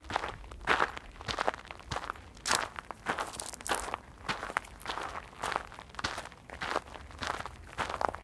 Footstep gravel sneakers
Clean loopable walk on gravel, with a few blades of grass. Shoes: man sneakers.
foot, walking, crunch, foley